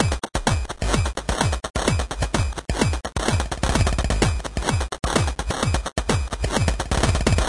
Bitcrushed House Loop 128BPM
Just the same loop as the others, but with slight tweeks and bitcrushed.
hi, bit, groove, snare, bitcrushed, 8, yah, electro, glitch, house, kick, stutter, french, hat, cutoff, loop